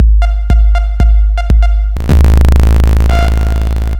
Subby 808 Kicks, Beeps, Noise
Deep 808 kick with beeps. Techno, minimal, electro noise.
Made with Ableton Live and Adobe Audition.
808, alert, kick, techno, deep